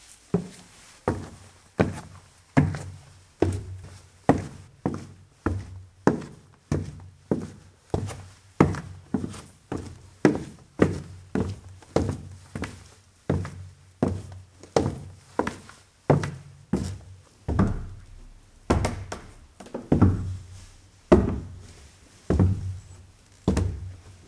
Footsteps Wood Indoor Soft
indoor, footsteps, foley, house, inside, stairs, wood, shoes